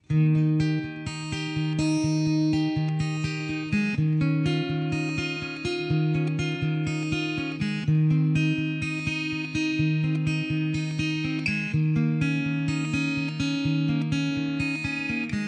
Acoustic Guitar Loop
A forgotten riff/loop that I stumbled upon. Loops at 62 bpm. Sounds rad with chorus effects etc. Go nuts!
62-bpm, acoustic, guitar, loop